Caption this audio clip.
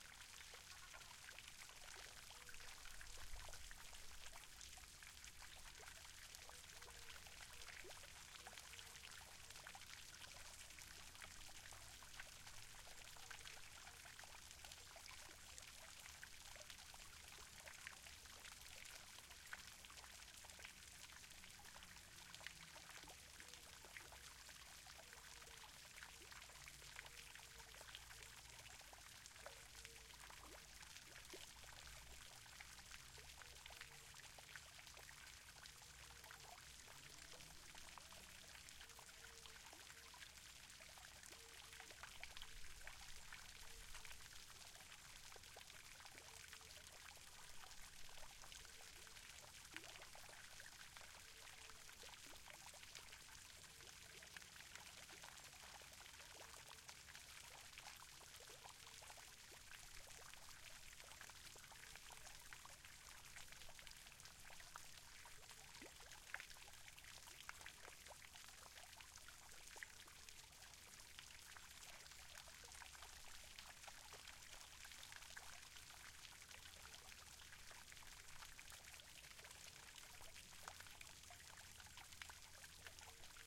090719 00 water parks birds
small water fountaine in the park